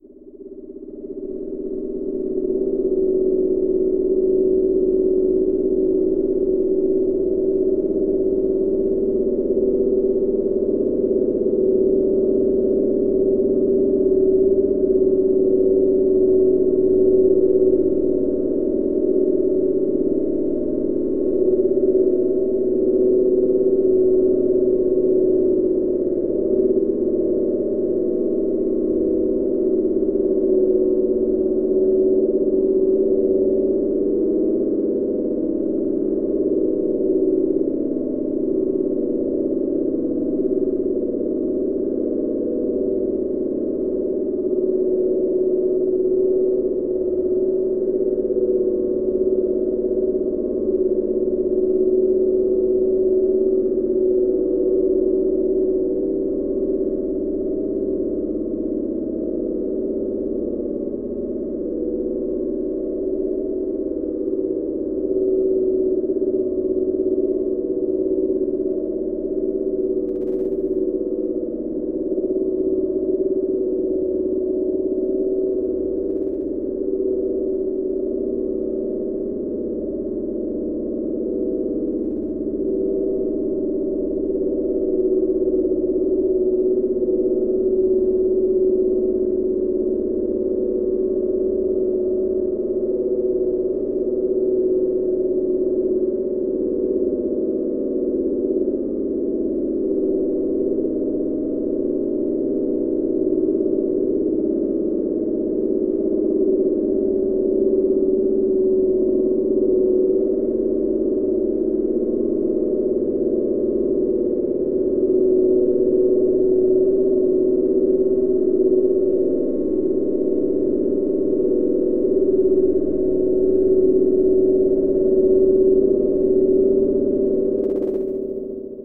Made from scratch in Audacity. Started with a generated 'pluck' and expanded from there. I think it has a bit of Doctor Who TARDIS interior background sound effect about it.
hum, space-ship-interior